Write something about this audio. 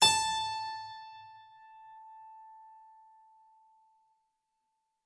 Harpsichord recorded with overhead mics
instrument, Harpsichord, stereo